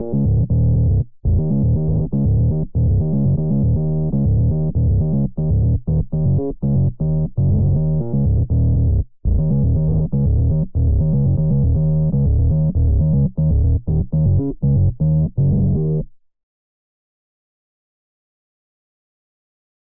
distorted square bassline
Distorted square synth bass melody
synth-melody, synthesizer-melody, distorted, melody, melodic, house, synthesizer-loop, loop, filtered, electronic, bass-melody, synth-loop, synth, 128bpm